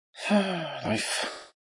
Me, re-evaluating my life.